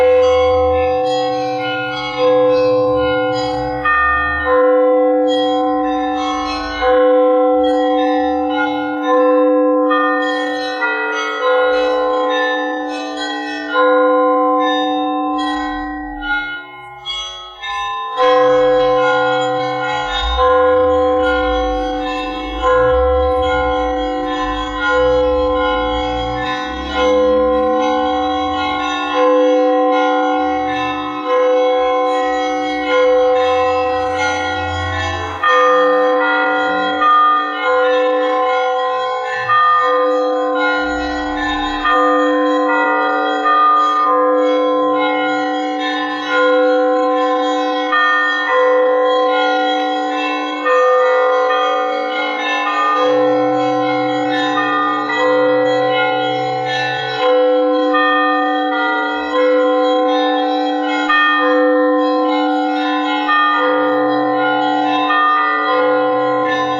church ringing
church bell in Naro-Fominsk (Russia)